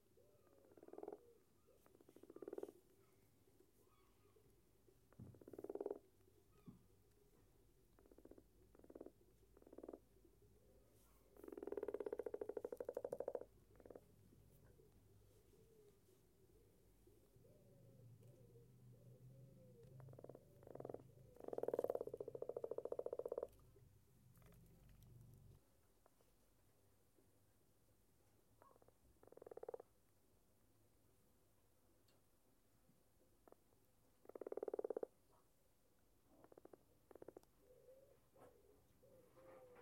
Frog Croaking (UK Common Frog)
croak, croaking, field-recording, frog, frogs, kingdom, pond, united